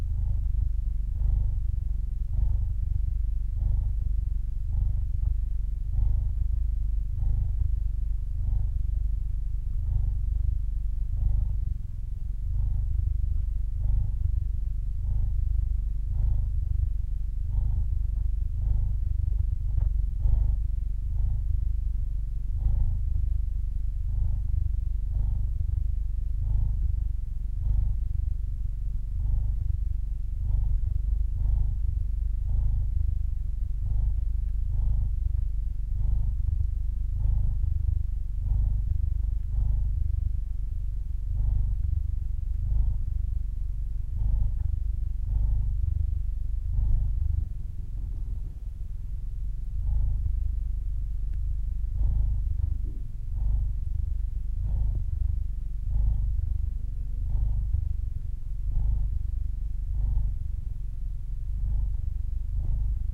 cat purr pt4
This is the recording of a purring female cat.
The recording had been done by placing the mic quite close to the cat throat and chest.
Some noises and swishes are due to cat movements.
Processing: gain raising, slight denoising.
cat, purr, purring